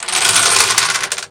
YVONNE Dominoes
The sound of dominoes falling.